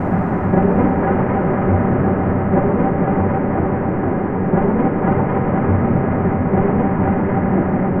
noise loop 120bpm